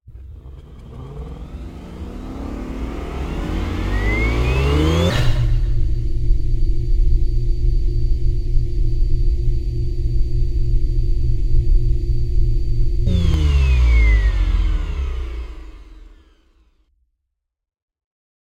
Spaceship starup and shutdown
A series of sounds, mixed with effects and layered upon each other. This is a star ship starting up, going through space, then shutting down. Cut it up in pieces if you like.
soundesign, space, ship, startup, spaceship